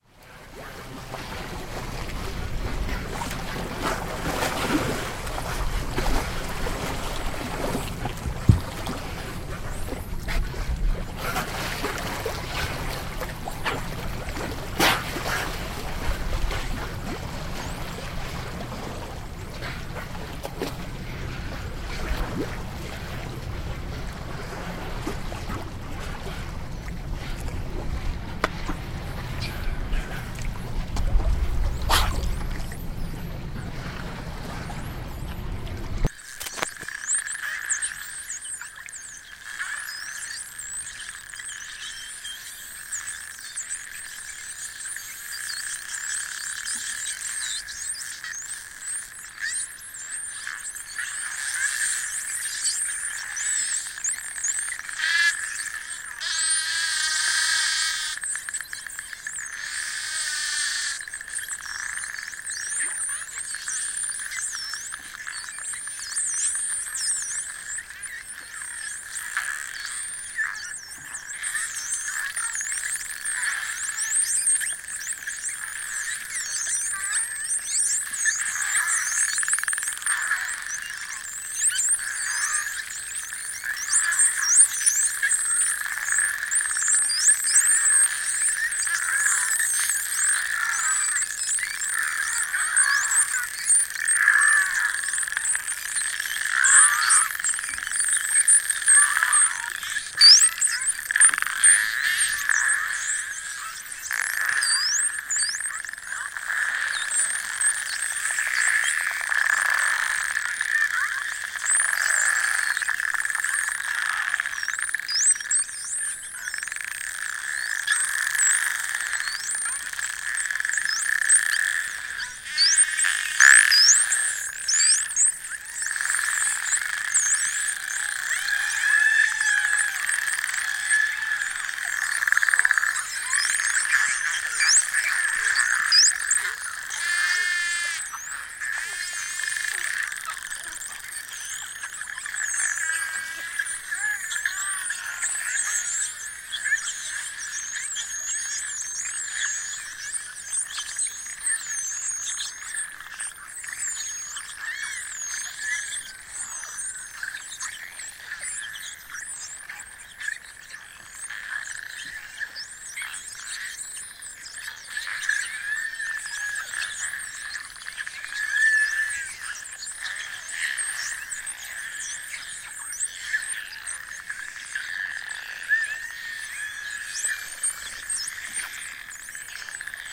Atlantic Spotted Dolphins off the coast of La Gomera, Canary Islands
A large group of Atlantic Spotted Dolphins (Stenella frontalis) recorded during a whale-watching boat trip off the harbour of Valle Gran Rey on the island of La Gomera, Canary Islands, Spain, 2017-03-11. The first part was recorded with a ZOOM2 recorder from the boat, you can hear the dolpins breathing. Then the recording was switched to an underwater hydrophone so you can hear the sounds the animals make.